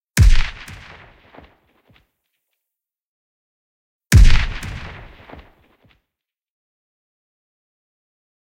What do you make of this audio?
Rifle Gunshot Tail
bang, fire, firing, gun, gunshot, rifle, shoot, shooting, shot, tail, weapon